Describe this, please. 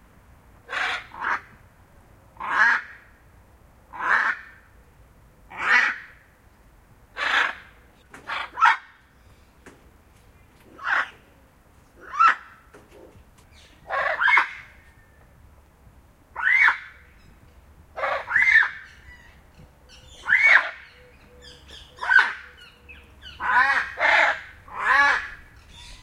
fr0608bg Hyacinth Macaw 2
The exotic call of the Hyacinth Macaw - also audible is the sound of its large wings. Recorded at Le Jardin D'Oiseaux Tropicale in Provence.
field-recording, bird, bird-song, tropical-bird, jungle, hyacinth-macaw, bird-call